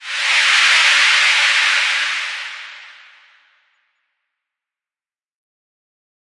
9 ca dnb layers
these ar 175 bpm synth background layers or bring forward in your mix for a synth lead could be used for drum and bass.
background, bass, club, dance, drop, drum, dub-step, edm, effect, electro, electronic, fx, glitch-hop, house, layer, layers, lead, loop, multi, rave, sample, samples, sound, synth, tech, techno, trance